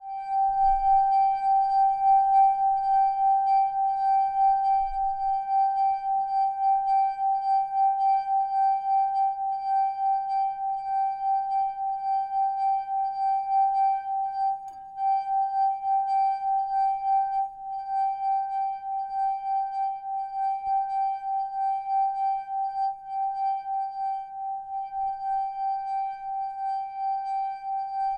Wine Glass Ring
alarm, glass, ringing, wine, wine-glass